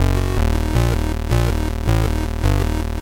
160 Fub Dub synth 02
bertilled massive synths
140, 160, bertill, dub, free, massive, synth